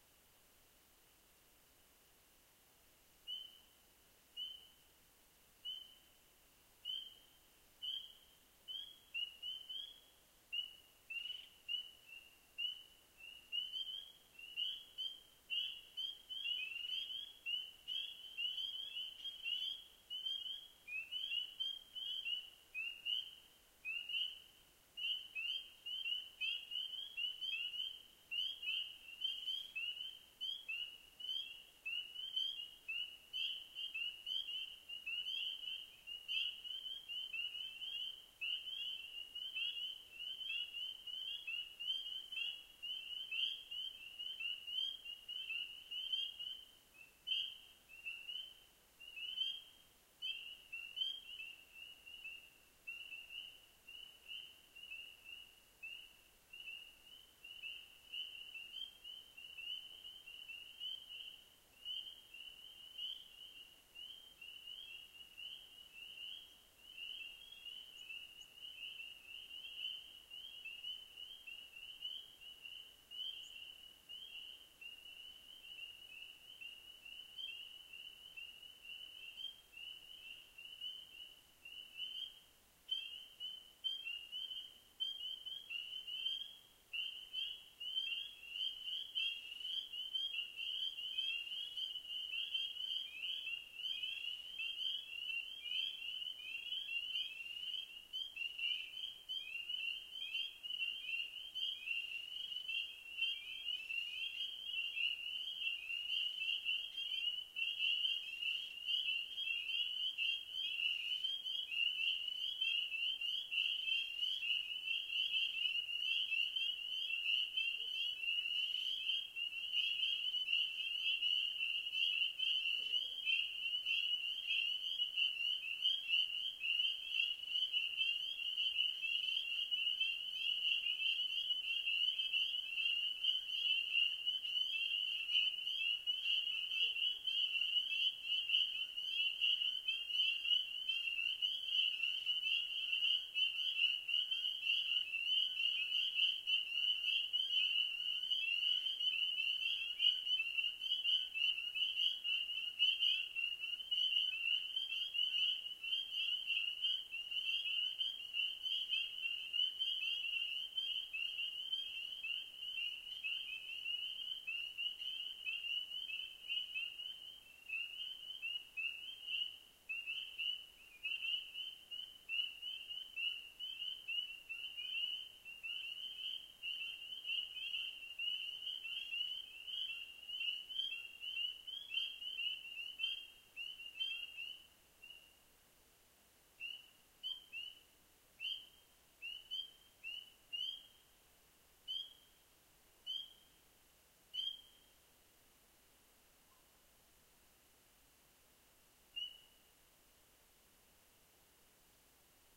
These were recorded May 26 2007, about two weeks after the first spring peepers (Pseudacris crucifer) were heard in the area. A dog in a distant garden can be heard barking a couple of times in this recording, but if anyone needs a clean recording I can probably find another, similar-length section of the original without extraneous sound. Or it could probably be edited out without too much trouble.
spring-peepers, tree-frogs, forest, night, pseudacris-crucifer, cape-breton